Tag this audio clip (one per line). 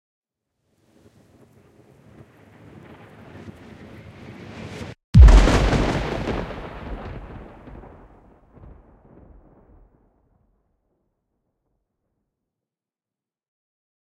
missile-strike
war
explosion
remix
fx
bomb
foley
sound-design
attack
sound-effect